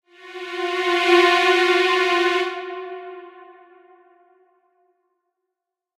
Dissonance Example
A dissonant violin sample from an instrument on GarageBand.
Crazy, Scary, Violin, Horror, Dissonance, Creepy, Haunted, Example, Ugly